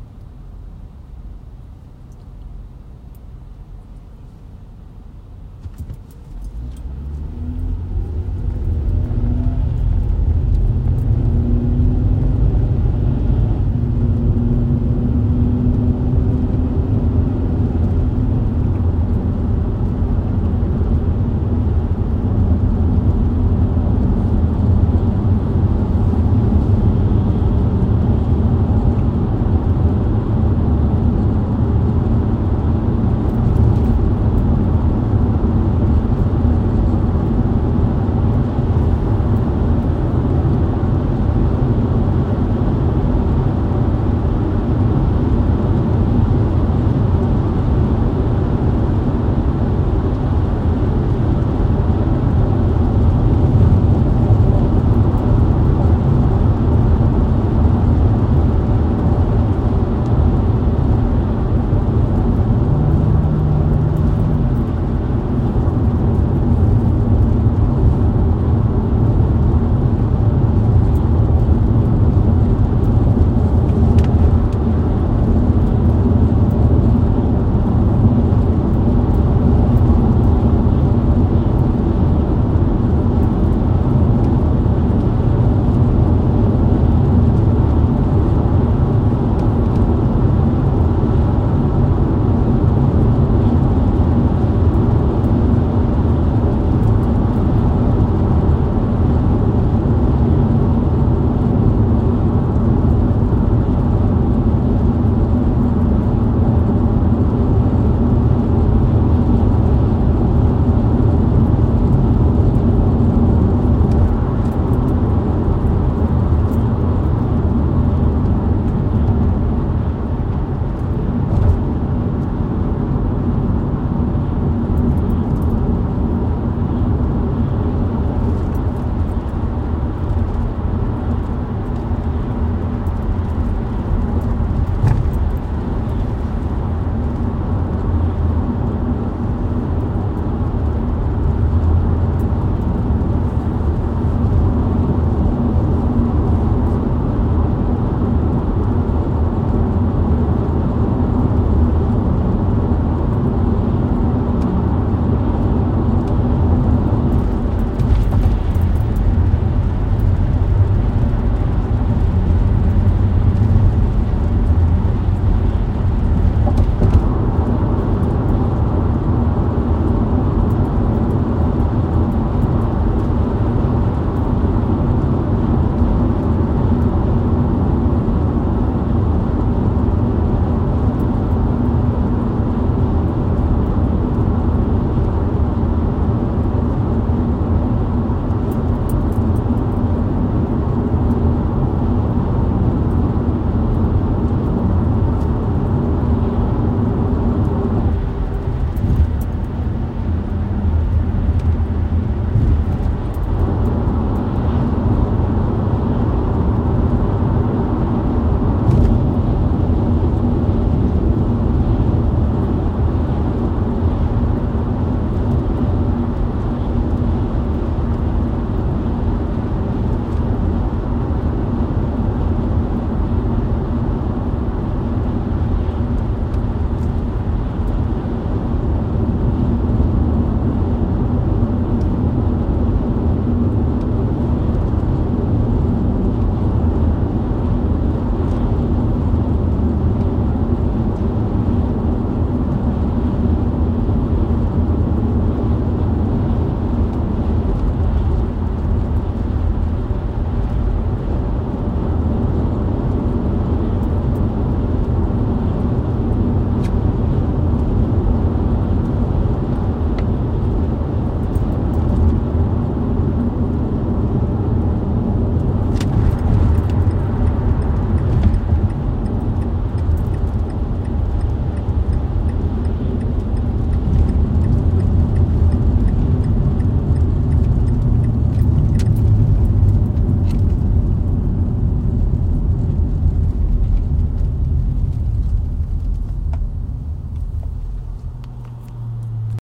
inside Mazda CX-9
Audio track of a Mazda CX-9 driving on the highway recorded from the interior with various turns and bridges.
bridge, automobile, car, drive, mazda, inside, interior, driving